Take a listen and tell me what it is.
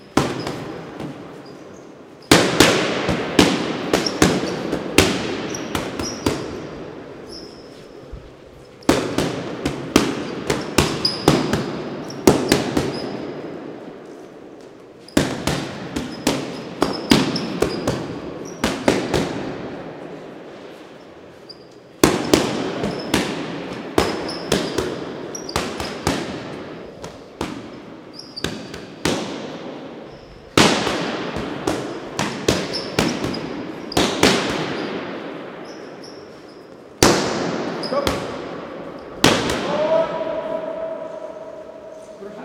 Professional boxer hits punching bag while training routine, his trainer gives some comments in Russian language. Huge reverberant gym.
Recorded with Zoom F8 field recorder & Rode NTG3 boom mic.